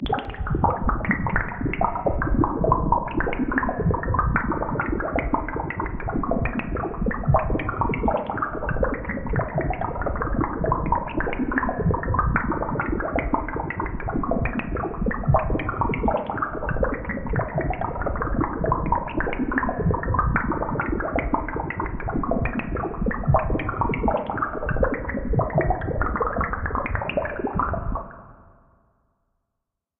bubbles M99B31dl
Synthetic bubbling of a boiling fluid. Made with synth and different filters.
clack, click, boil, liquid, quick, drip, synthetic, hard, burst, fluid, bubble